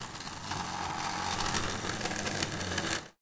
Wood Scraping 6
Scraping a plank. Recorded in Stereo (XY) with Rode NT4 in Zoom H4.